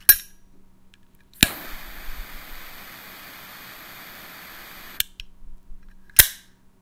Opening, triggering and closing my zippo torch lighter
burn, butane, click, flame, lighter, metallic, noise, zippo